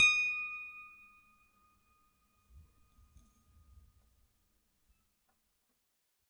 a multisample pack of piano strings played with a finger
fingered, multi, piano